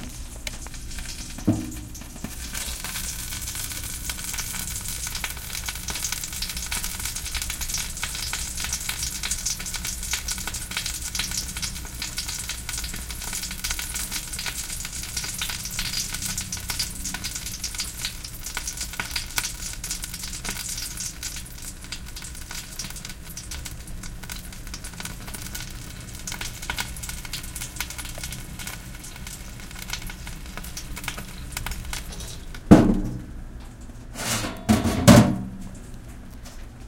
Chicken roasting in a toaster oven. Stereo. Recorded with a Sony PCM-10 (levels to "Auto").
chicken, cooking, food, oven, roast, sizzle